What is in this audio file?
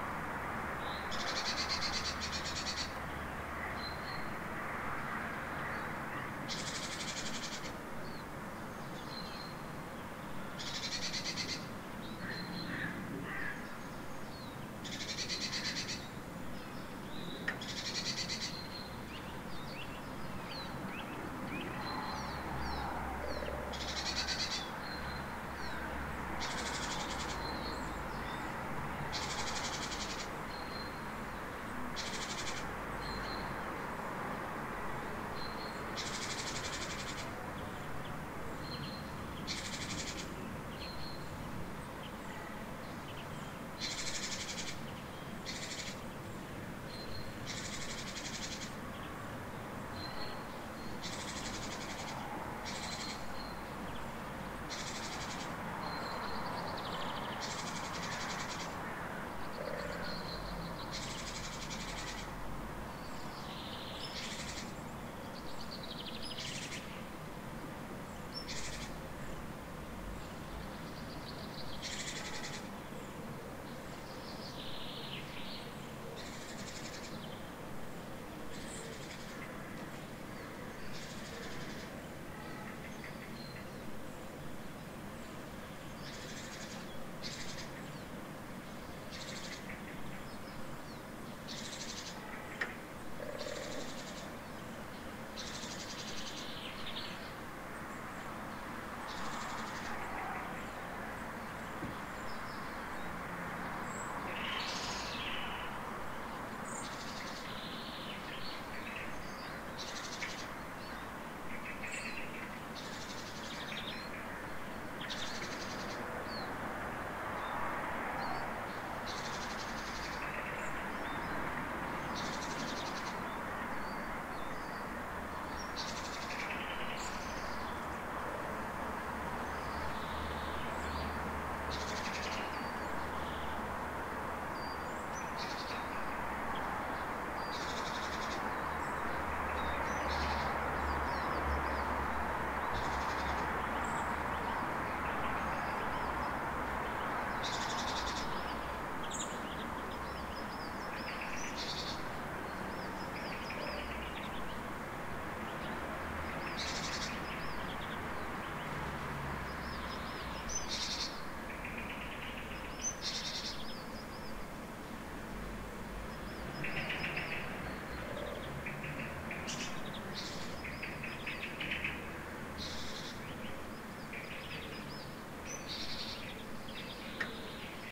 Recorded with Zoom H2 at 7:30 am. Near street-noice with several birds
6channel, morning, graz, birds, garden